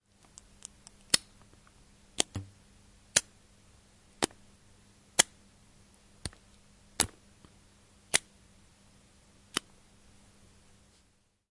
mySound WB Zoe
zoe, wispelberg, cityrings, belgium